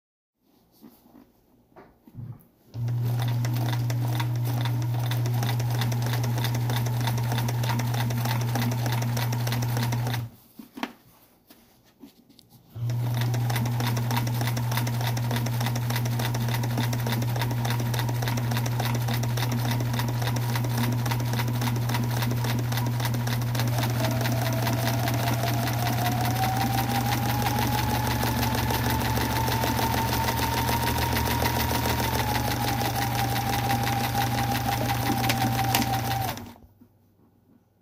A home sewing machine
clothing, machine, mechanical, sewing, sewing-machine